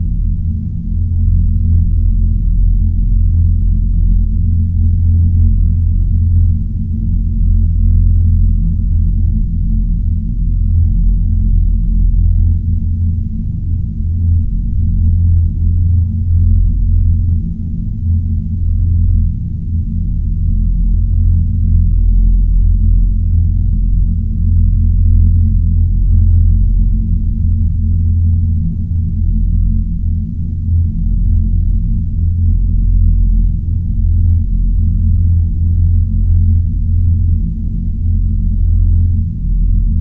Dark Ambient Sound
Spooky wind sound.
Recorded with a Zoom H2. Edited with Audacity.
Plaintext:
HTML:
ambience, atmosphere, background, creepy, dark, deep, game-sound, horror, sinister, suspense, thriller